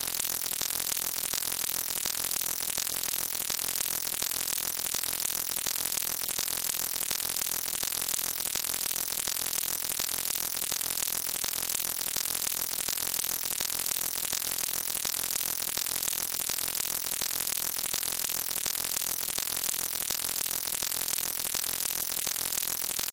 fart poot gas flatulence flatulation